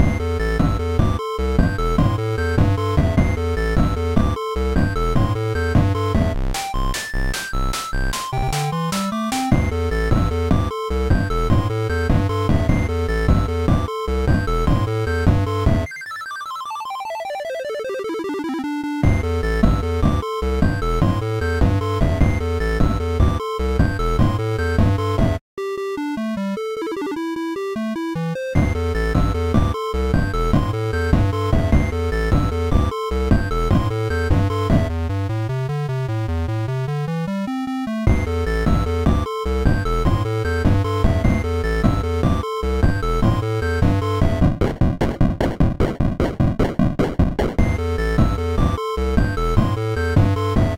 Pixel Song #3
free, Pixel, Happy, music, loop